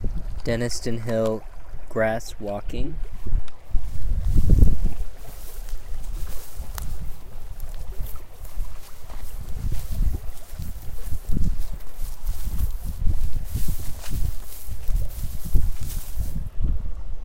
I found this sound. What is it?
Walking in morning grass in NY state. NTG-2, Tascam-DR60D